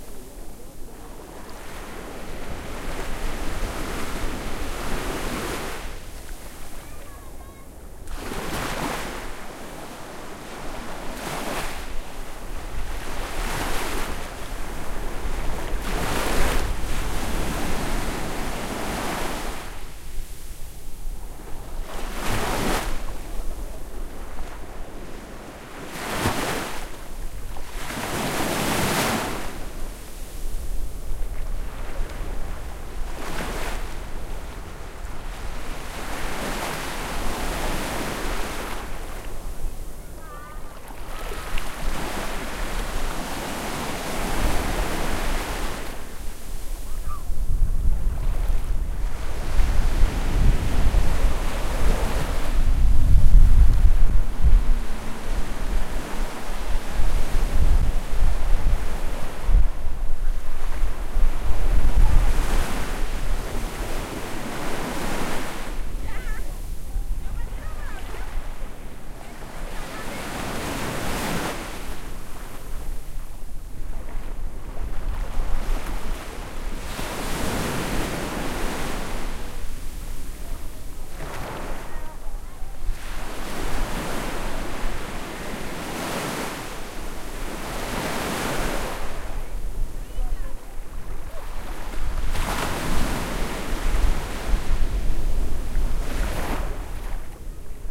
Recorded at a beach in Santa Marta, Colombia